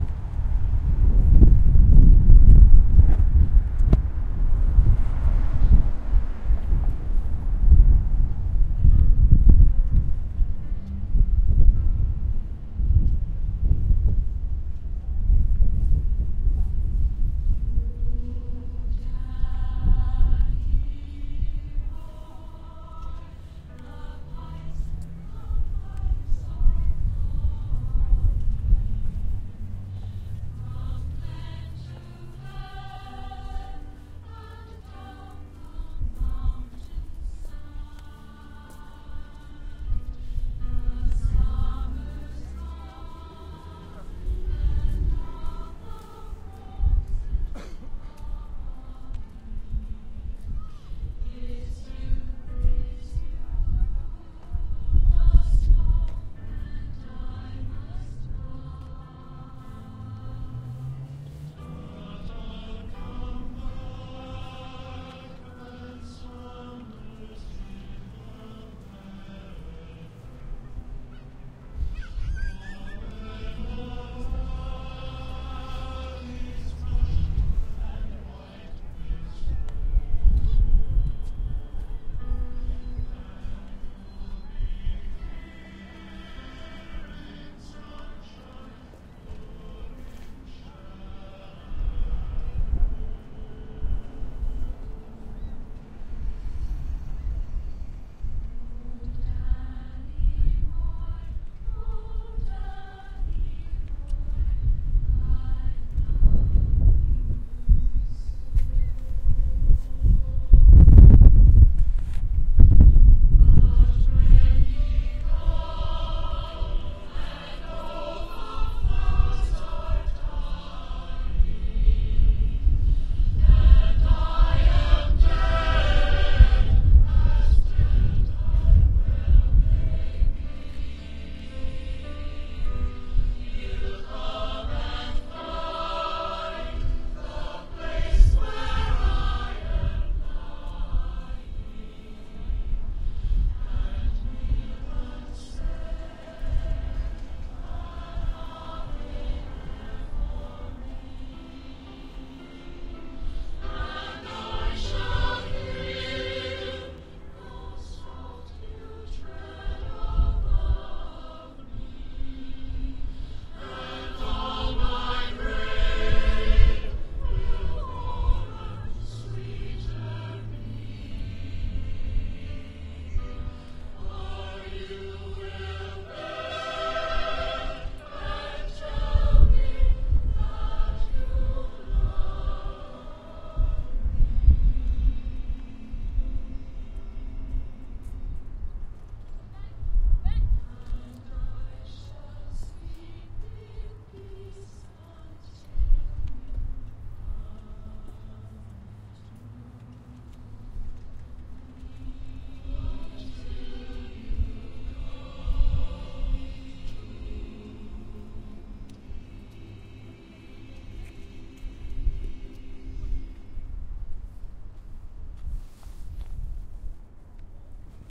Recorded in 2005 on an unusually chilly September day, while walking in downtown Ottawa, friends and I came across a ceremonial event at the war cenotaph. I thought I'd record the sounds of traffic, people and background music and my timing was good as the choir launched into a sombre and touching rendition of Danny Boy to remember fallen soldiers of war.
street, ottawa, cenotaph, danny-boy, remembrance, crowd, choir